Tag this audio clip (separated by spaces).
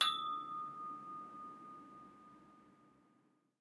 metal resonant ring percussive pole hit